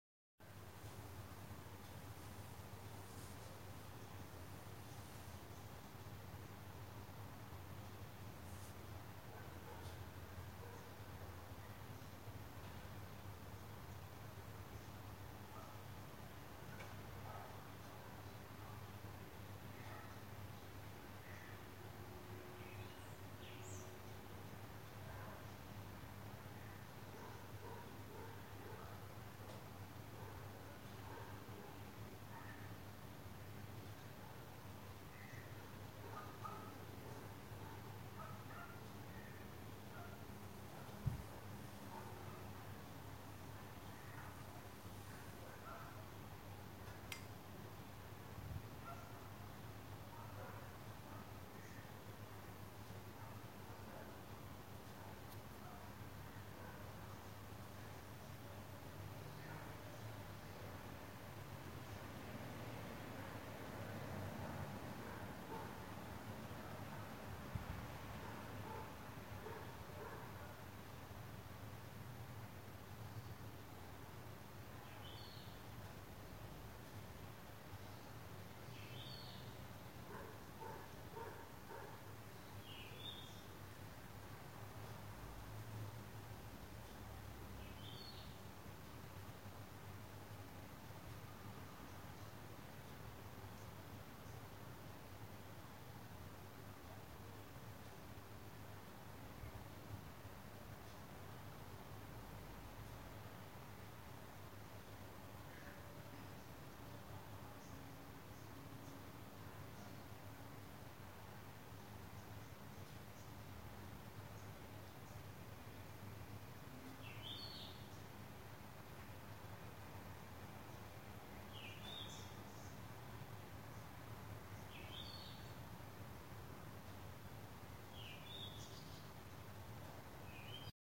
A Zoom H6 recorder, with the XY Capsule was used to record the ambience of the suburbs in South-Africa, during the day.